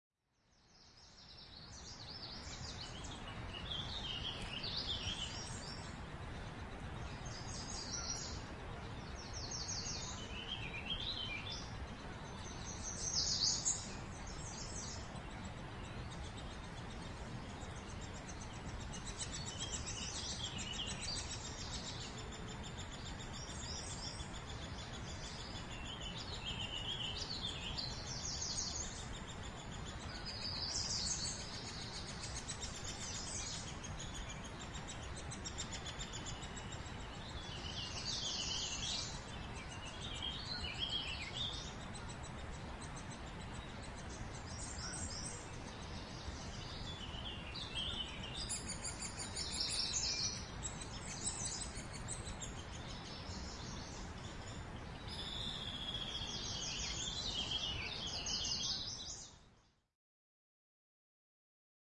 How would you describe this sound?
Birds in Montreal's Parc de La Visitation
Zoom H4N Pro
forest, Montreal